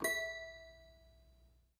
This lovely little toy piano has been a member of my parent’s home since before I can remember. These days it falls under the jurisdiction of my 4-year old niece, who was ever so kind as to allow me to record it!
It has a fabulous tinkling and out-of-tune carnival sort of sound, and I wanted to capture that before the piano was destroyed altogether.
Enjoy!
Carnival, Piano, sounds, toy-piano